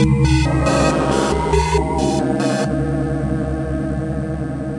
PPG 013 Non Harmonic Rhythm G#2
This sample is part of the "PPG
MULTISAMPLE 013 Non Harmonic Rhythm" sample pack. The sound is a
complex evolving loop in which the main sound element is a dissonant
chord that has its amplitude modulated by an LFO
with rectangular shape. The result is a sort of rhythmic sound or
melody. In the sample pack there are 16 samples evenly spread across 5
octaves (C1 till C6). The note in the sample name (C, E or G#) does not
indicate the pitch of the sound but the key on my keyboard. The sound
was created on the PPG VSTi. After that normalising and fades where applied within Cubase SX.
experimental, multisample, ppg, rhythmic